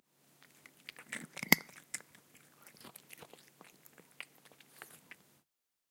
Cat eating a cat bisuit.
Zoom H4n recorder